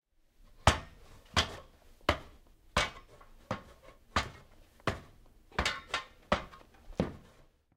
boots on aluminum ladder 01
Boots climbing an aluminum ladder
boot, foot, shoe